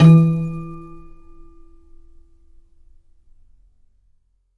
Gomme longue 36 E3
Some eraser pieces stuck in piano strings, with an aluminum foil wrapped around sometimes, recorded with Tascam DP008.
Des bouts de gomme sont coincés dans les cordes pour faire ressortir des harmoniques. Il y a aussi un peu de papier alumnium enroulé autour de certaines cordes. Le tout est capté par le bon vieux Tascam DP008.
piano, prepared